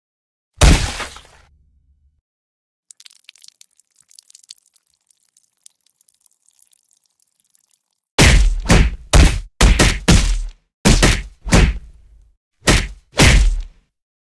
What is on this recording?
Zombie beatdown FX
Extended FX performance, zombie beatdown, lots of blood'n'guts.
blood, dead-season, drip, flesh, gore, hit, smash, splat, squish